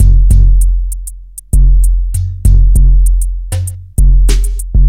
Drumloop A new age starts kick+hihat fill - 2 bar - 98 BPM (no swing)
drum-loop, break, beat, breakbeat, groovy